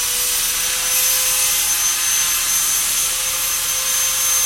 disc grinder handheld ext short
grinder, disc, handheld, ext